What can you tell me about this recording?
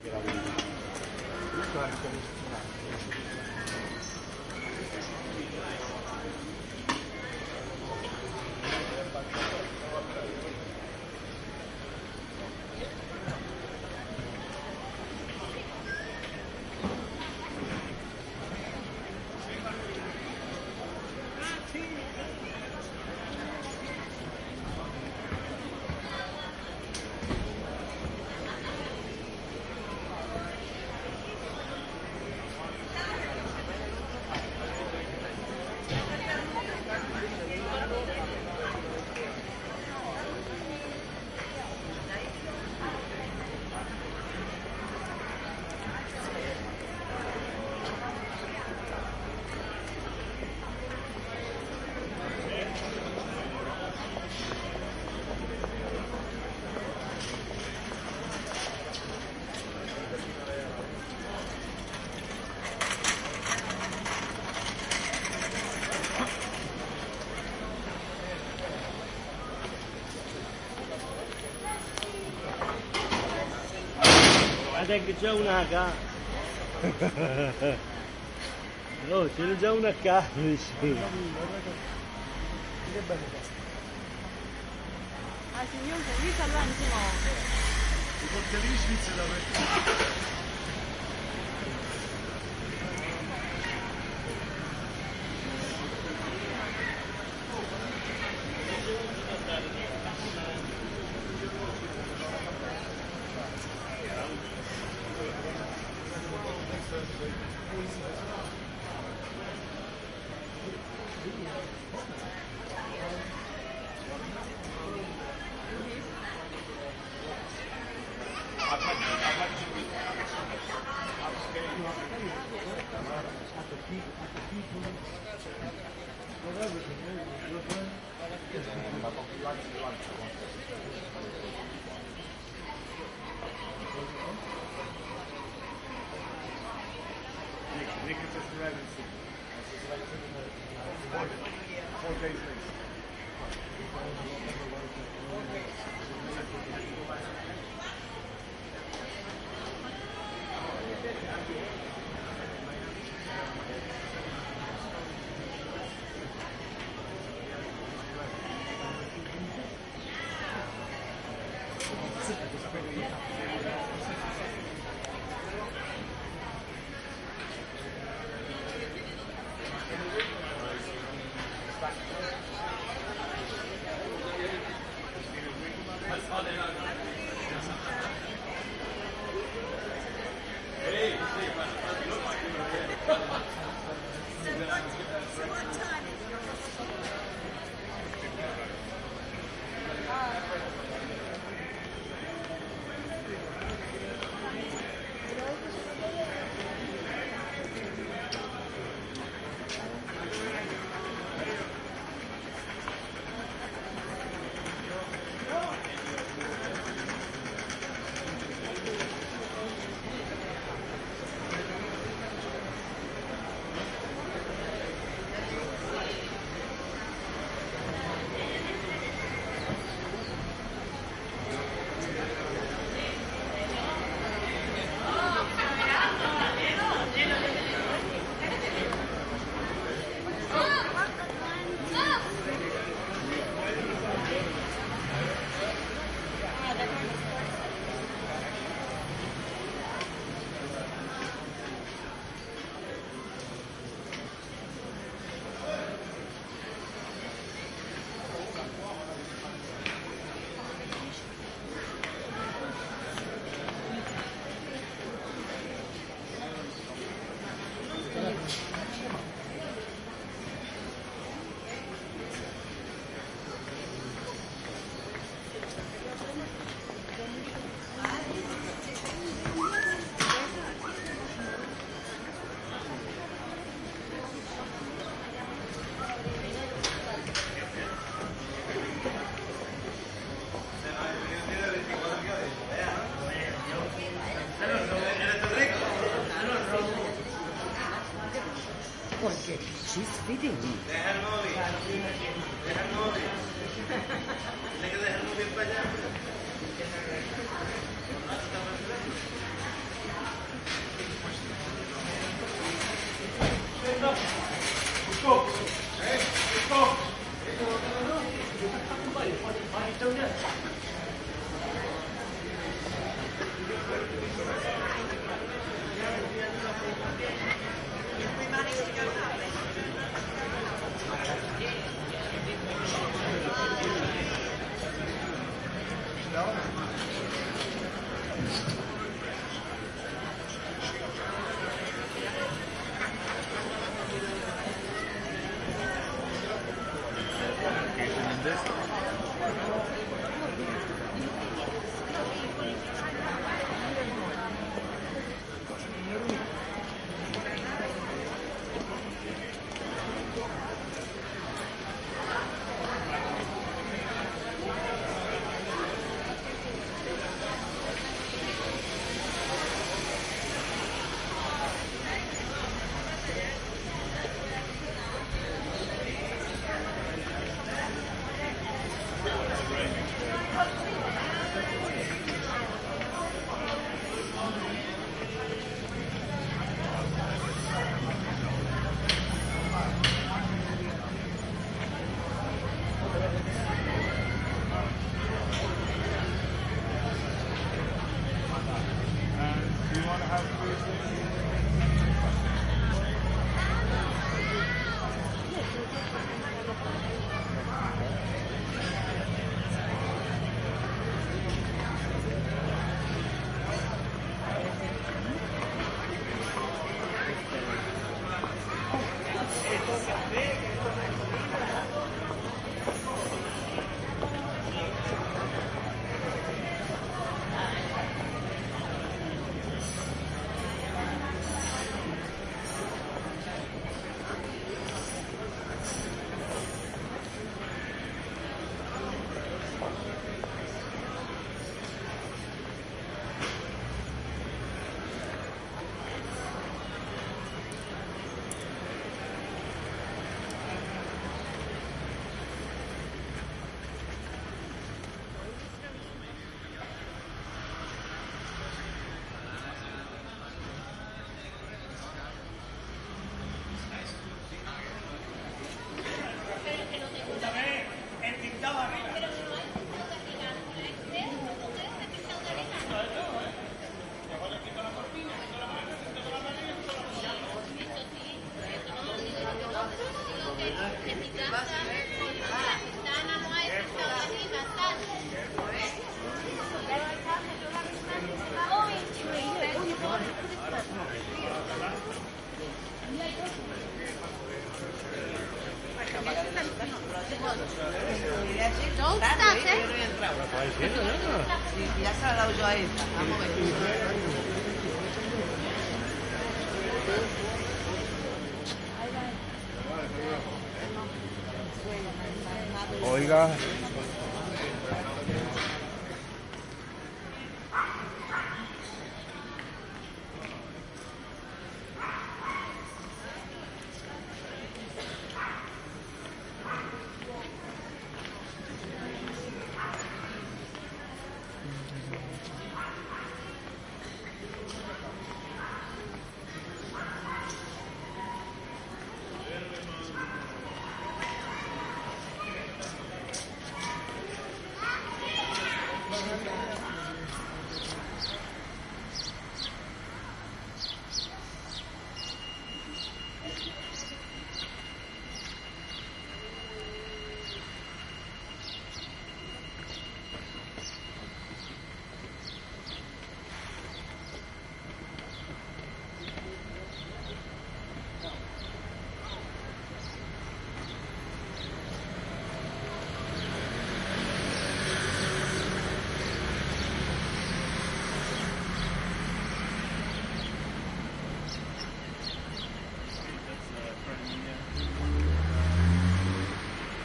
Gibraltar Main Street (tourists chatting, footsteps, birds, low traffic). It actually sounds like any other pedestrian zone with tourists. No background music. Recorded with artificial head microphones using a SLR camera.